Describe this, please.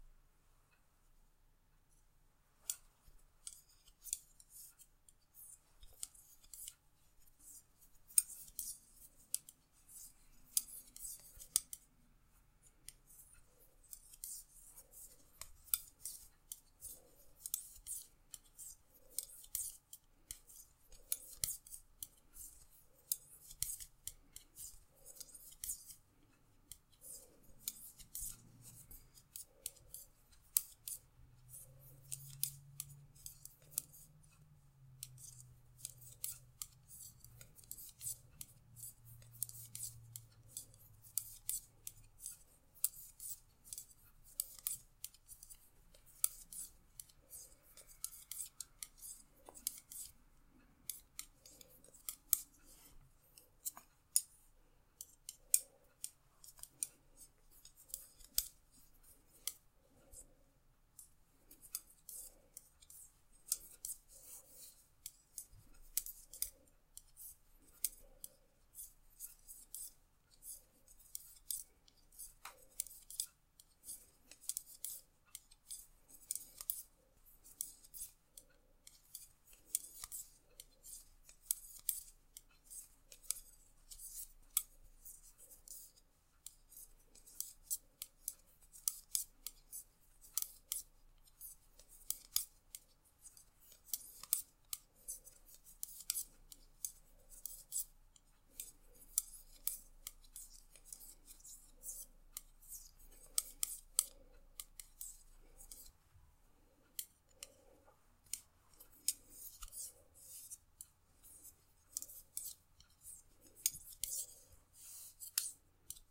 Knitting with Metal Needles
1:55 of knitting with metal knitting needles. It is very rhythmatic and soothing.
Knitting, Metal-Knitting-Needles, Crafting